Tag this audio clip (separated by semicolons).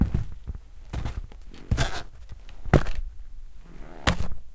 footsteps; tree